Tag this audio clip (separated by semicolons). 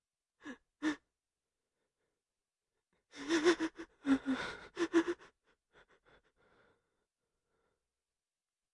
man; despair; sadness; crybaby; cry